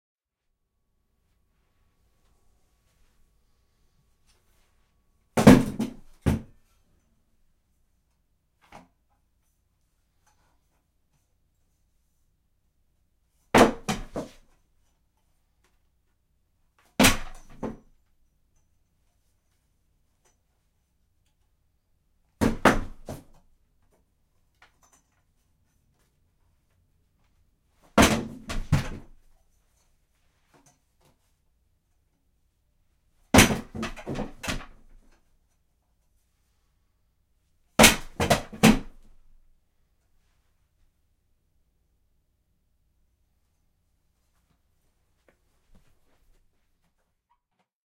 Mic used was an AKG Perception 220.
smash, impact, wood, furniture, rumble